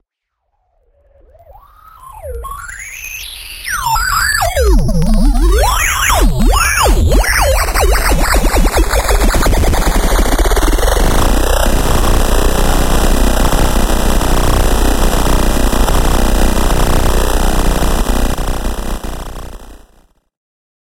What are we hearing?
RISERS 26 165-8 with tail
Analog Seqencing and Digital Samples